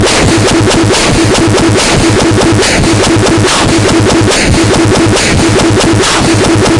DR Ruiner noise loop3
bent, circuit, dr-550, drum, loop, machine, roland, samples